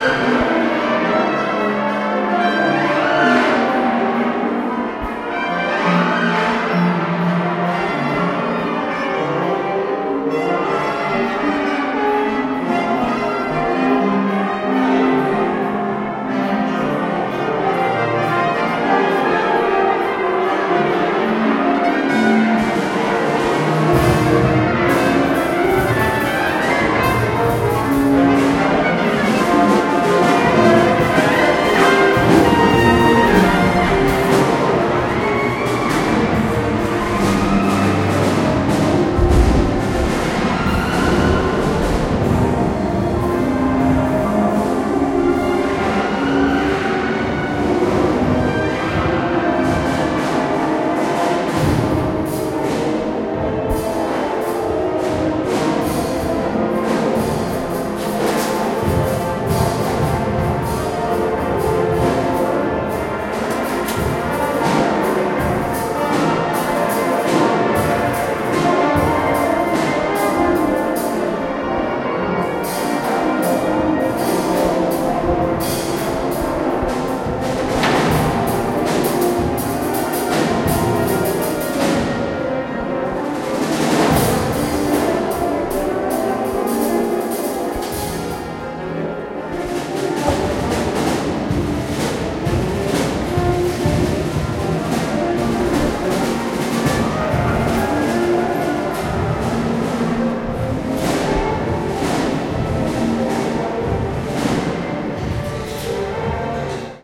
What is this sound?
Tuning at Couch
Tuning from two bands rehearsing at the Couch building, which hosts the School of Music at Georgia Tech, Atlanta, GA, USA. Recorded from the corridor of the second floor while two bands in two different rooms are rehearsing with their doors open. Recorded on November 3, 2016, with a Zoom H1 Handy Recorder.
dissonance, field-recording